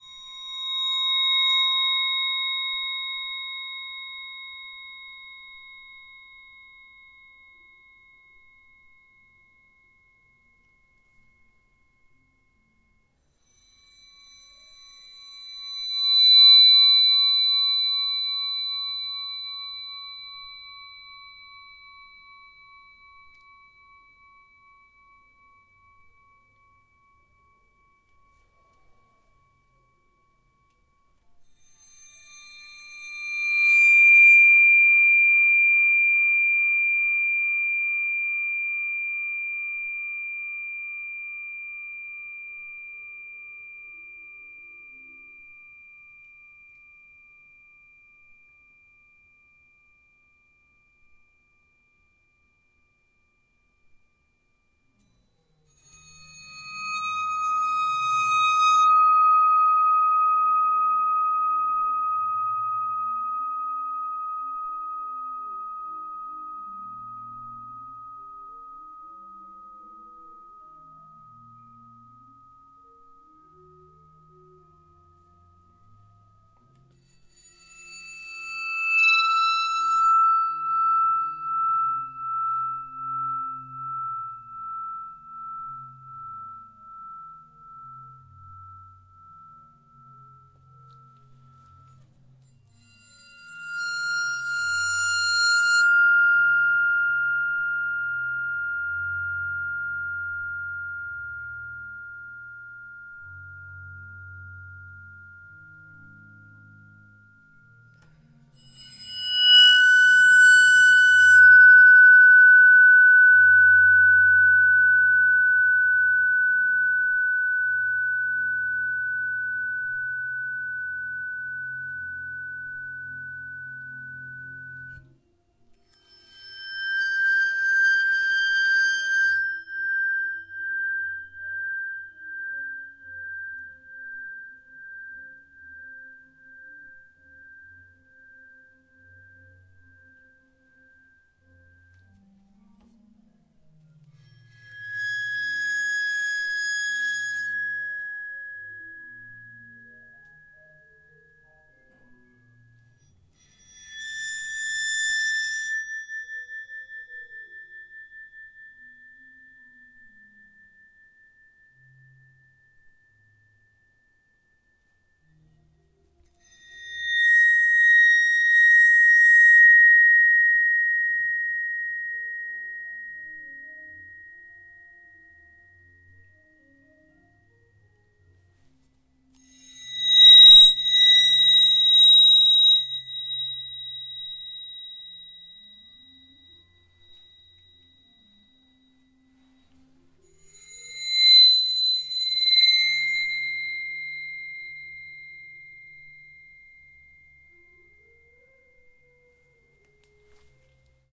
Crotales Bow C-C
Careful if you're on loud speakers! Bowing a set of crotales up the chromatic scale from C to C, accordingly, with the mic at each disk. I recorded with a Zoom H4n. If you want more lower bowed notes, check out the 'Vibraphone Bow F-F' in the pack.